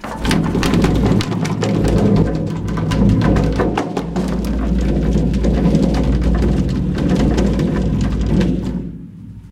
Sounds For Earthquakes - Wood and Deep Plastic
I'm shaking my floor-tom. Recorded with Edirol R-1 & Sennheiser ME66.
shaking, movement, wood, waggle, shaked, deep, suspense, plastic, collapsing, noise, rumble, rattle, stirred, collapse, moving, falling, tom, earth, rattling, earthquake, stutter